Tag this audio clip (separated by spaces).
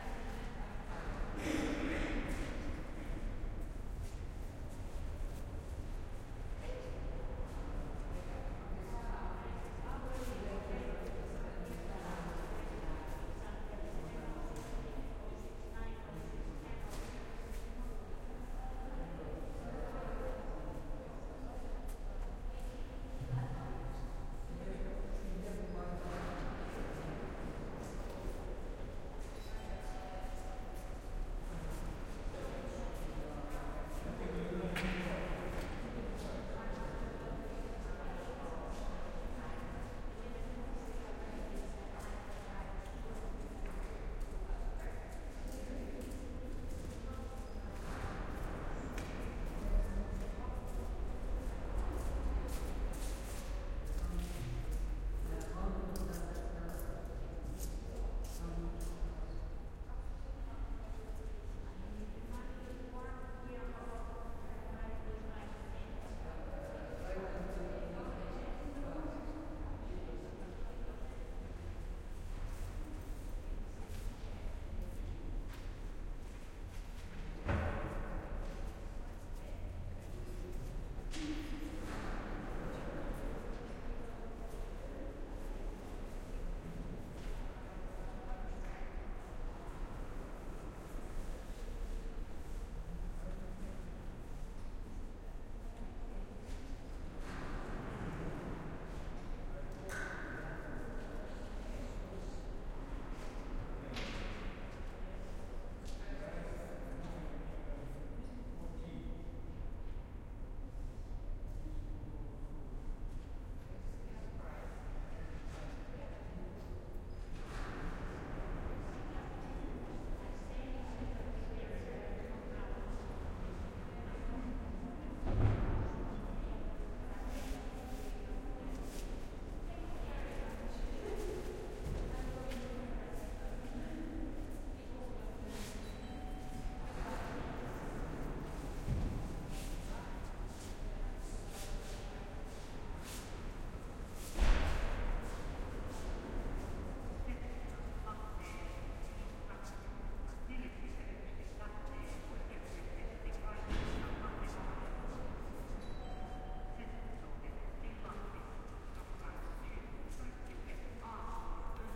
atmo atmosphere background railway station